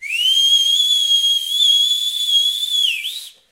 Long Whistle #2
Another one long powerfull male whistle.
("Solovei The Brigand")
environmental-sounds-research, male, people, whistling